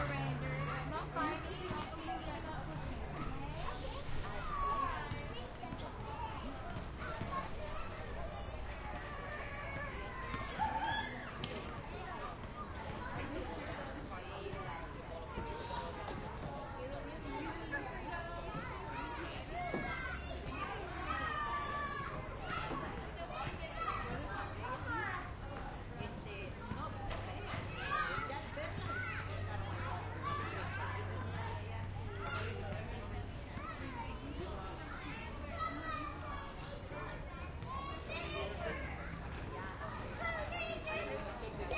Day Kids Playing in The Park 2
Field recording of kids playing at a park during the day.
2, day, field-recording, kids, park, playing